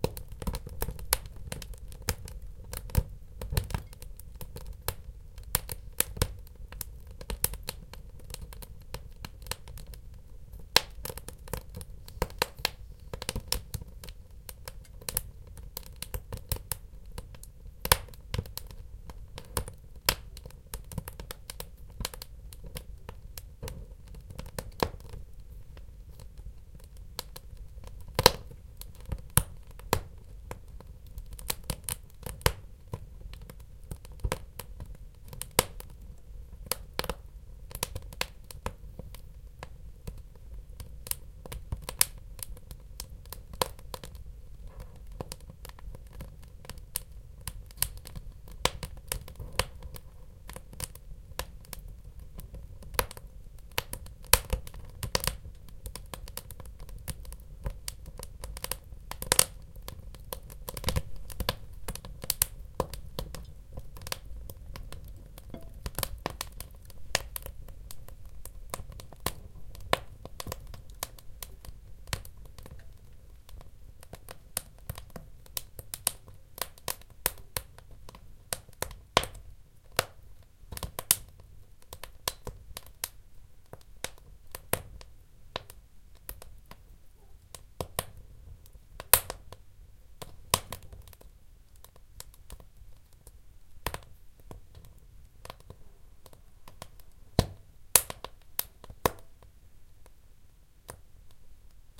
Fire in the stove 2
Fire in the stove sound recorded with Tascam DR 40-X
burn, burning, combustion, crackle, crackling, field-recording, fire, fireplace, flame, flames, heat, hot, snap, spark, sparks, stove